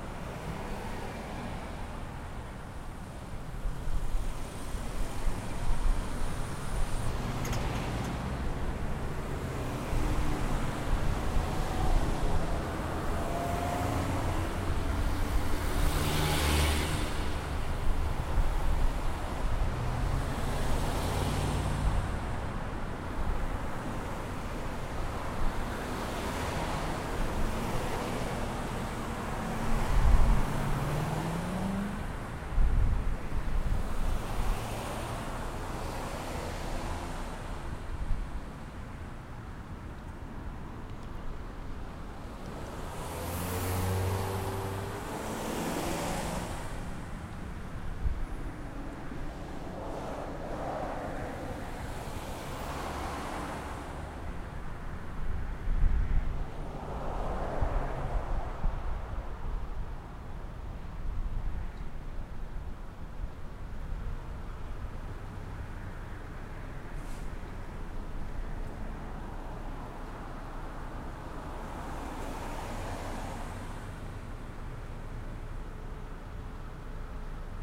Waiting at another red light recorded with laptop and Samson USB microphone.